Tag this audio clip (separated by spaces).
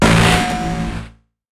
Distorted-Drums Distorted-Snare-Single-Hit Distorted-Snare-Drum Distorted-One-Shot One-Shot Snare-Drum-One-Shot Overblown-Snare-Drum Distorted-Snare-One-Shot Snare-One-Shot Snare-Drum Distorted-Snare-Drum-One-Shot Single-Hit Distorted-Drum-Hit Distorted-Single-Hit Distorted-Snare Distorted Snare Distorted-Snare-Drum-Single-Hit Overblown-Snare